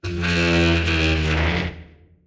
chair dragon lament
Processed recordings of dragon a chair across a wooden floor.
roar, call, dragon, creature, monster, beast, growl